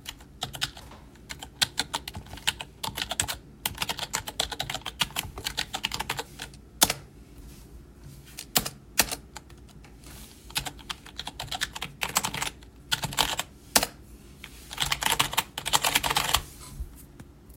Several Typing Sounds.
Recorded with iPhone SE 2nd Gen